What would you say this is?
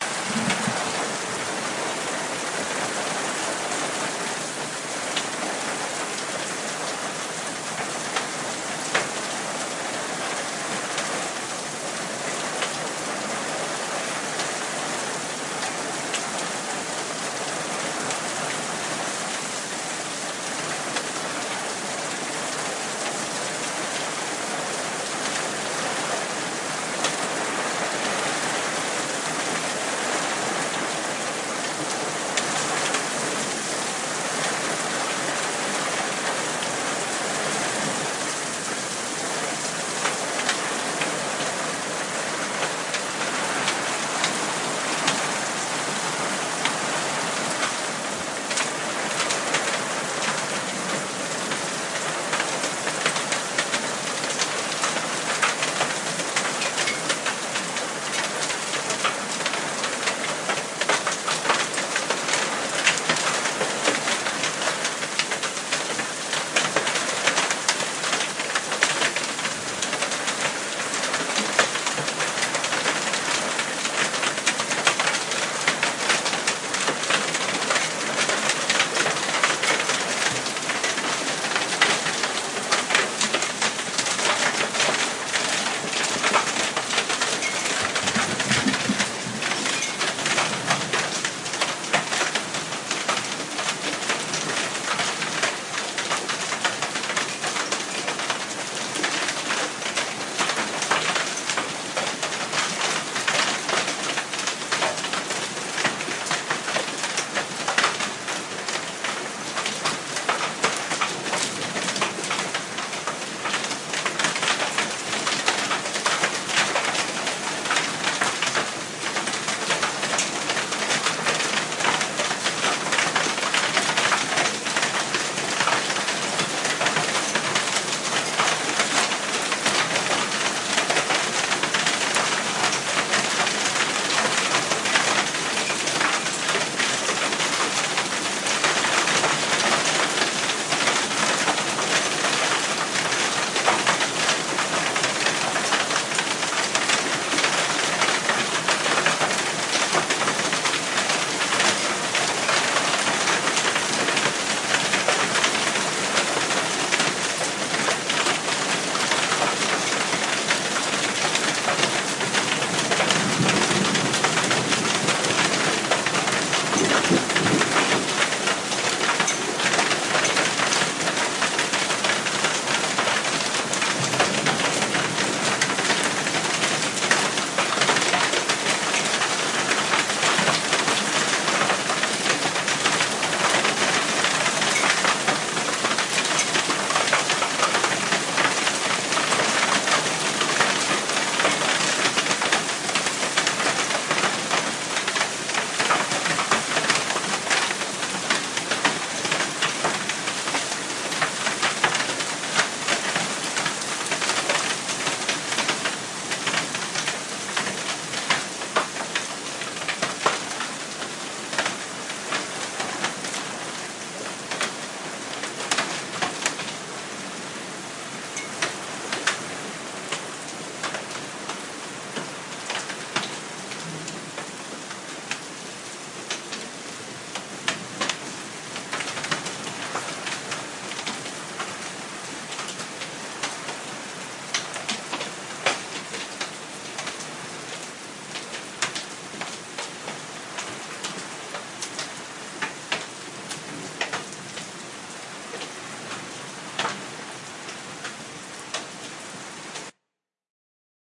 Rain and Hail Methow Valley
An intense storm rolled through the Methow Valley this afternoon, and I recorded this with a Blue Yeti mic connected directly to my MacAir in Garage Band. There's rain, hail, thunder, and wind.
twisp, field-recording, hail, winthrop, methow, rain